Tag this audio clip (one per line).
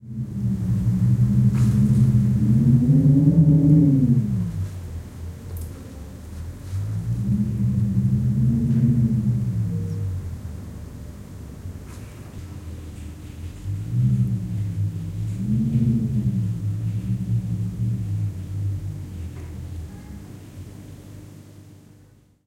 wind
field-recording